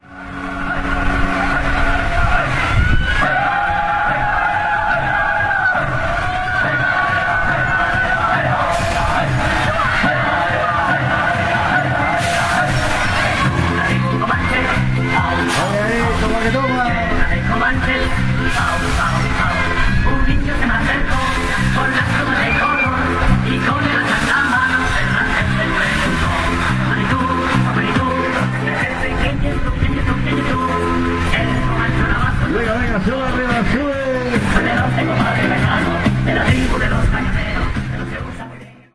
Fires - Toros
This sound recorded with an Olympus WS-550M is the sound of bulls fair where people have to try to stay sit down in a toy bull.
fairs, bulls, fun